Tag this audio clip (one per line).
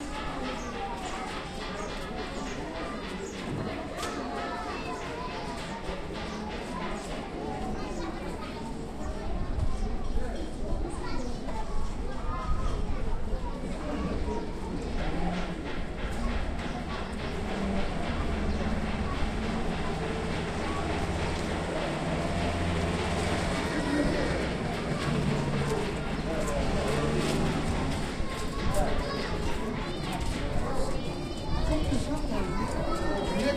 ambience
craftsmen
field-recording
medina
Tunis
voices